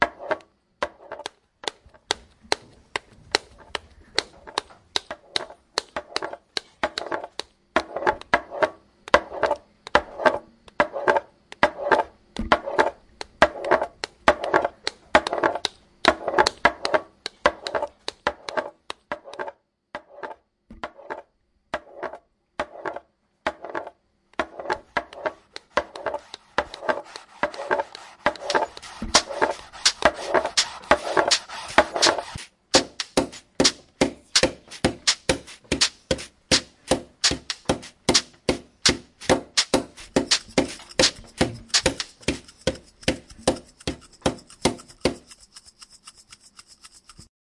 Soundscape GWAEtoyIS soundstory003
Genius Hour and radio club students from GEMS World Academy Etoy IS, Switzerland used MySounds from Pacé, in Ille-et-Vilaine students to create this composition.
Soundscape, TCR, Field-Recording